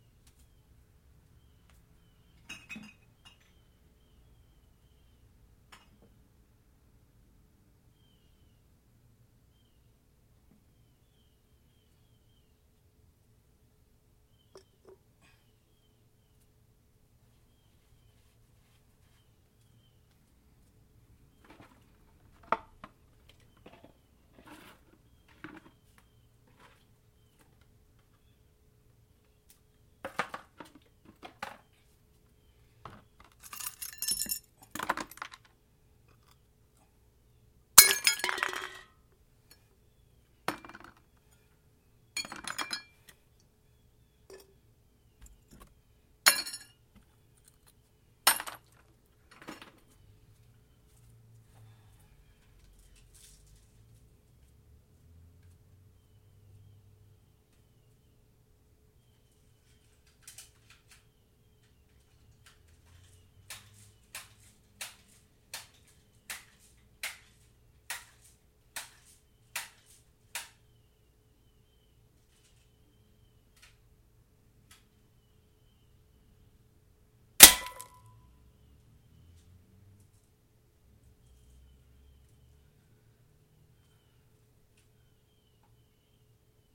Cleaning up the bottles then plinking some soda cans from 30 feet away with bb's recorded with a Samson USB microphone direct to cool edit on the laptop.
air, aluminim, bb, can, glass, gun, plinking, purcussion, rifle, tin, ting